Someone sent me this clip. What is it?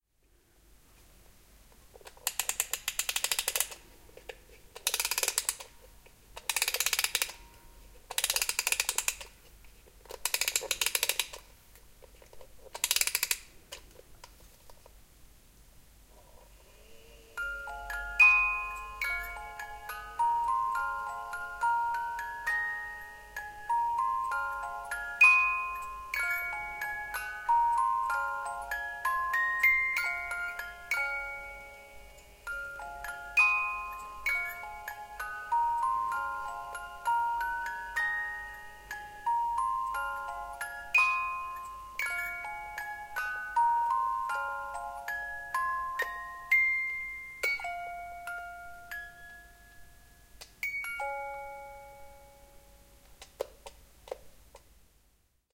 Binaural field-recording of an edelweiss music box, including the wound up noise.